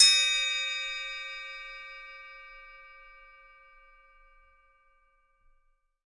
Cymbal recorded with Rode NT 5 Mics in the Studio. Editing with REAPER.
beat, bell, bowed, china, crash, cymbal, cymbals, drum, drums, groove, hit, meinl, metal, one-shot, paiste, percussion, ride, sabian, sample, sound, special, splash, zildjian